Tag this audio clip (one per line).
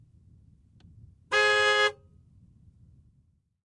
car
horn
short